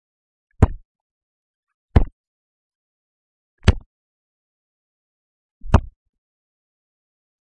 Kickin' around the ole' pigskin
I kicked around a football for about 5 minute and got some pretty good punt sounds.